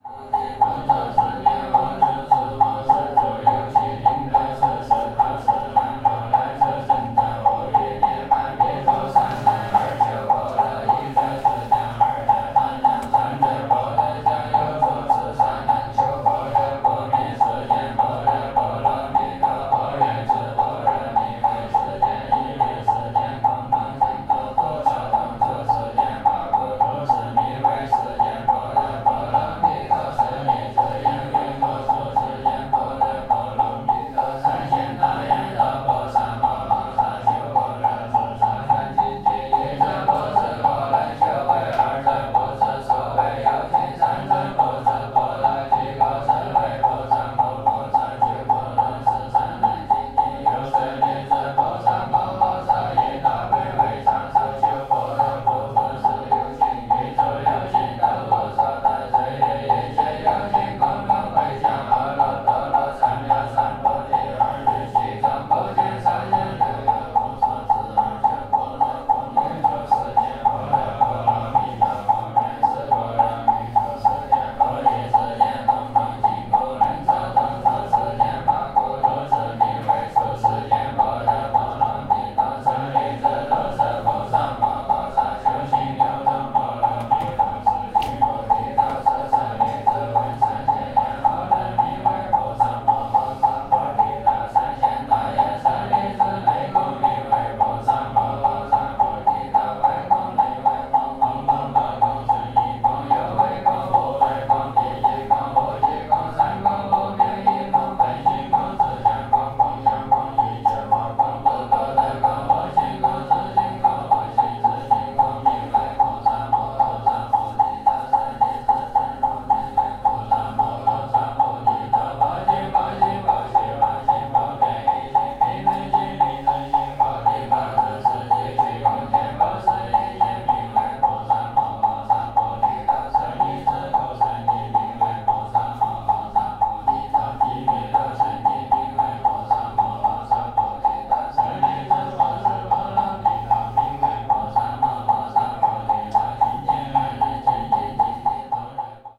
Young buddhist monks practising with metronome at buddhist monastery in Emei Shan (China)